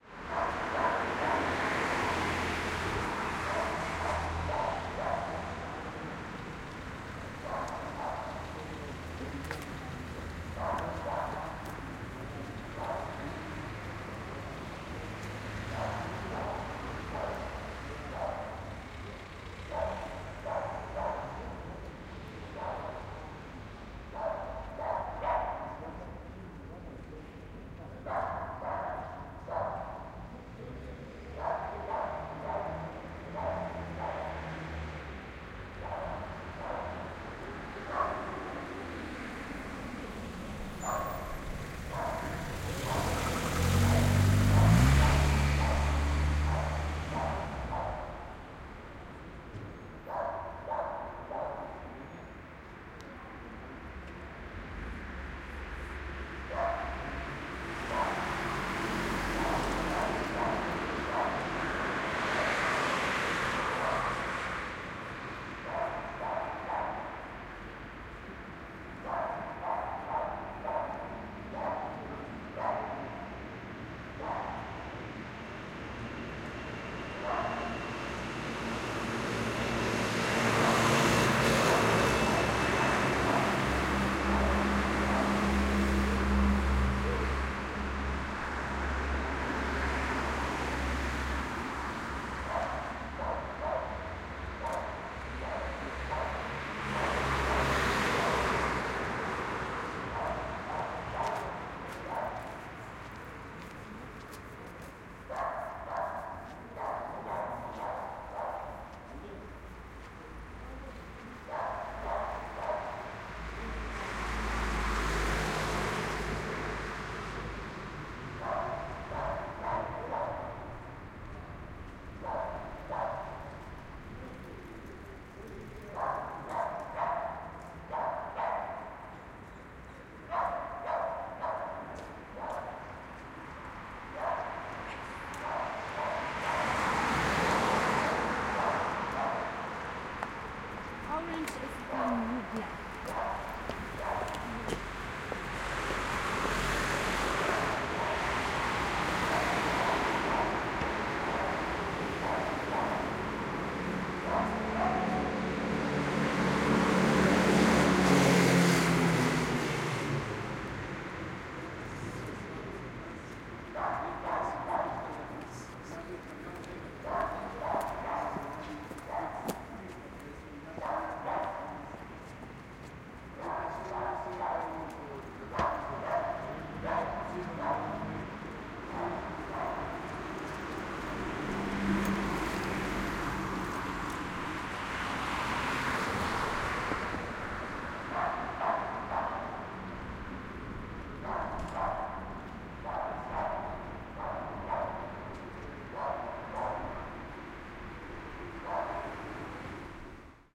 Ambience of Street with cars passing by and dog barking nearby.
Recorded with a spaced array of 2 KM184 (front) and 2 KM185 (surround) into a Zoom H6.